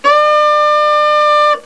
Gourdophone
staccato triplet. Recorded as 22khz
invented-instrument, gourd, handmade